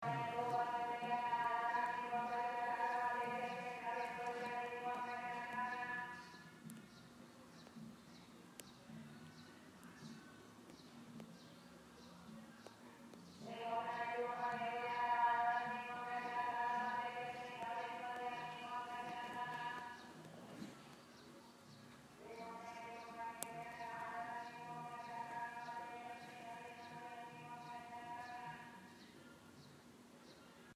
Some persons buy and sell scrap metal on the streets as a way of living
vendedor, calle, chatarra, gritando, de, junk, selling, shout, scrap